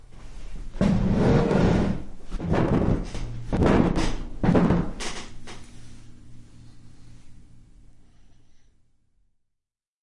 Audio 6 SpoonSlurps
Pushing a chair across a wooden floor
chair, scrape, wooden, floor